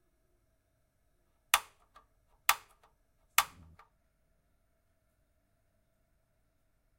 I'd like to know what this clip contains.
Recorded with: Zoom H6 (MSH-6 Capsule)
Turning on the gas and igniting a gas stove.